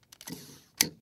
es-scotchtape
adhesive, cellophane, scotch, sticky, tape